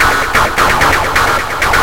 FLoWerS 130bpm Oddity Loop 002
A neat loop with a weird pink or red noise rhythm. High resonance, on TS-404. Only minor editing in Audacity (ie. normalize, remove noise, compress).
experimental, dance, resonance